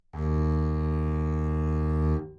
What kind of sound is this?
Double Bass - D#2
Part of the Good-sounds dataset of monophonic instrumental sounds.
instrument::double bass
note::D#
octave::2
midi note::39
good-sounds-id::8604
single-note, double-bass, Dsharp2